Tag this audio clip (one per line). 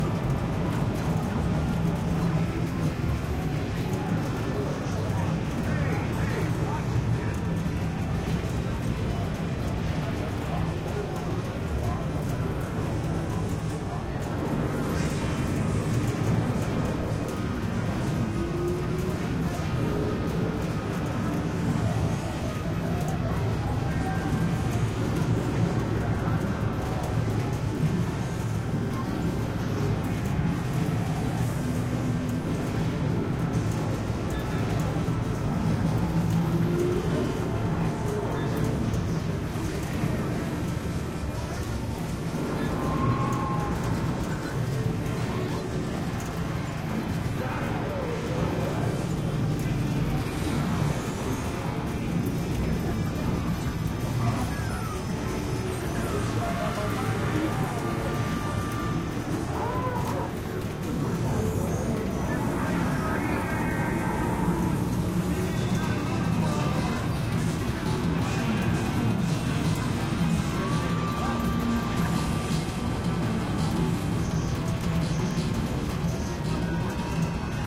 pinball
game
video
ambiance
gaming
arcade
field-recording